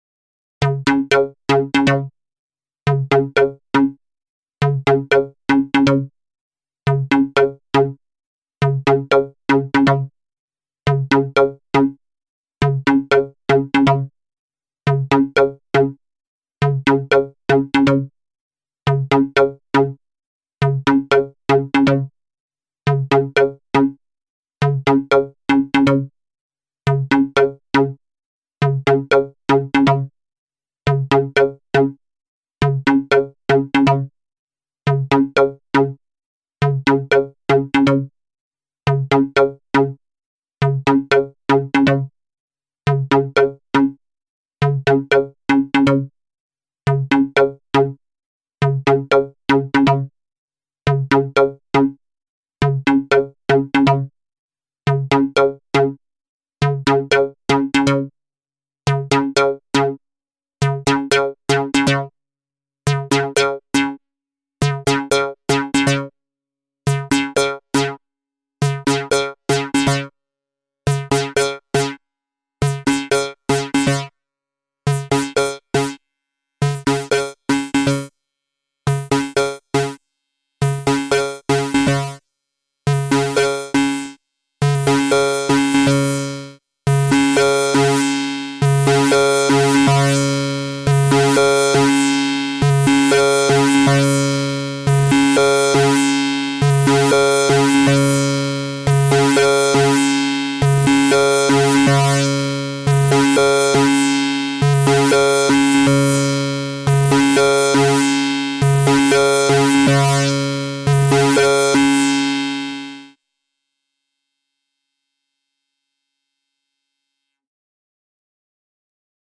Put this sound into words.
Some recordings using my modular synth (with Mungo W0 in the core)
Analog, Modular, Mungo, Synth, W0